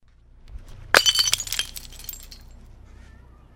Glass Smash 14
One of the glass hits that I recorded on top of a hill in 2013.
I also uploaded this to the Steam Workshop:
break, shards, crack, fracture, shatter, hit, crunch, broken, breaking, glass, smashing, bottle, smash